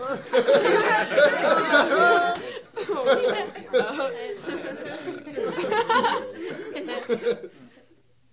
Laugh 4 among themselves
Small audience laughing mildly to themselves
theater, theatre, studio, audience, group, laughing, laughs, laugh, crowd